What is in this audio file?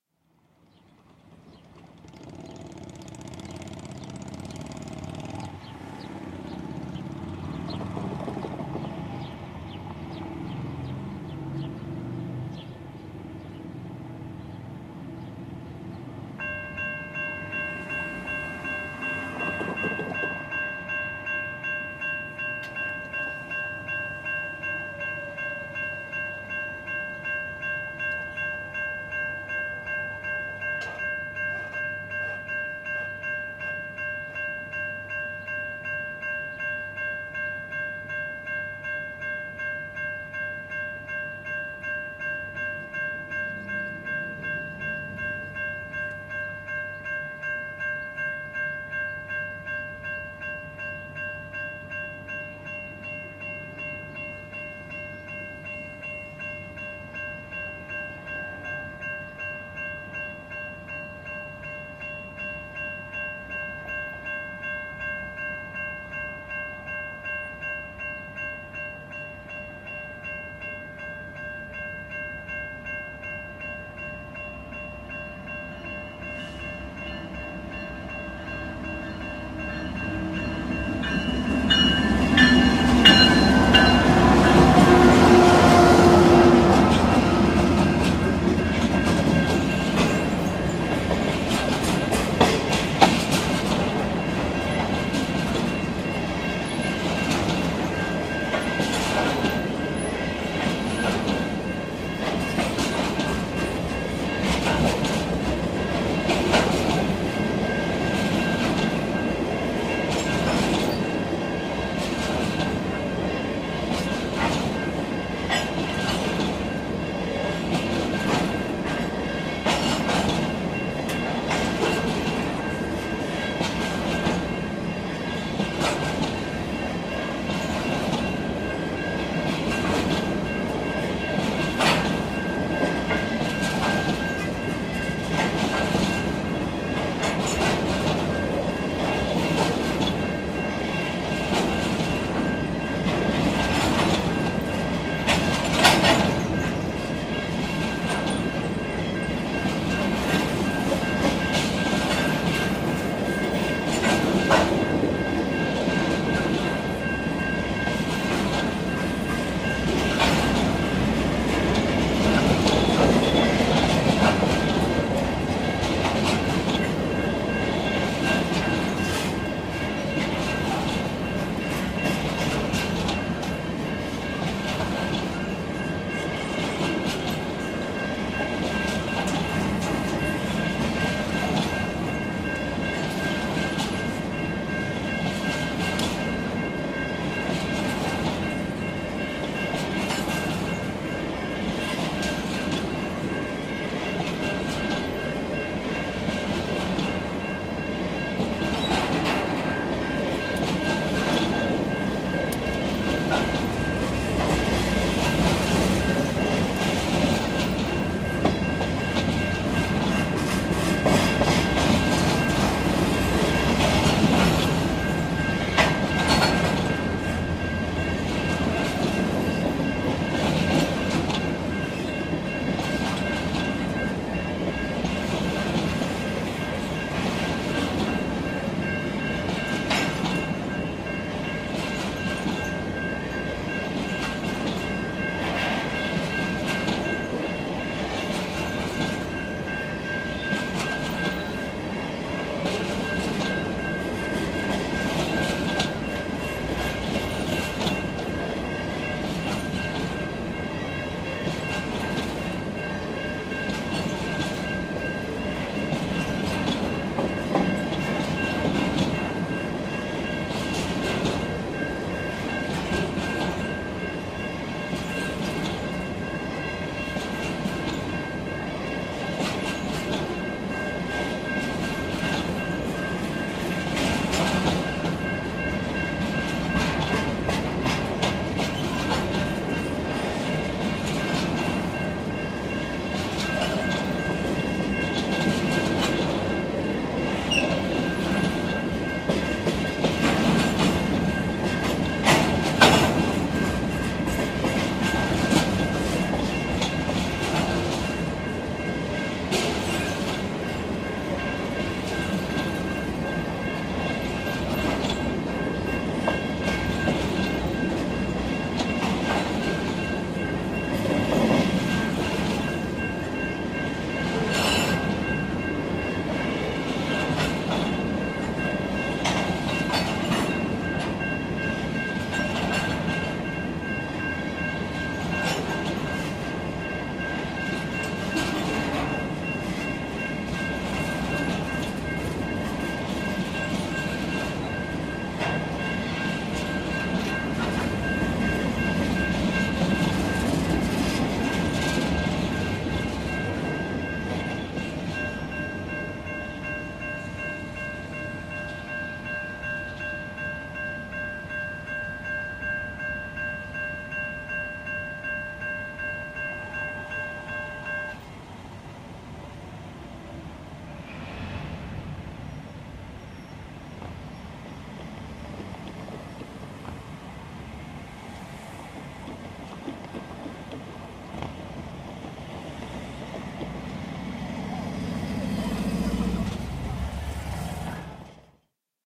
Railroad Crossing and Freight Train Passes
Cars and a motorcycle cross track just before the crossbuck begins to clang and a freight train rumbles past for about 5 minutes.
rail, car, traffic, train, crossbuck, freight, motorcycle, clang, railroad, tracks, field-recording